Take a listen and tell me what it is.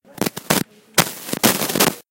STUPID HEADPHONES
My old Nakamichi Headphones glitch in it's own mic. Recorded with my iPhone and my old headphone's mic.